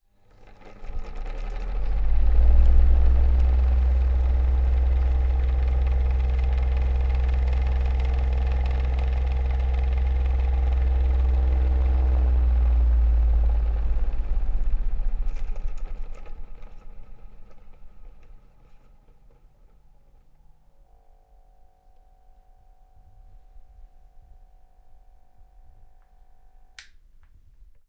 FAN 4 (Slow Stop2)
Slowing down two)
rotation, helicopter, fan, old, motor, aircraft, airflow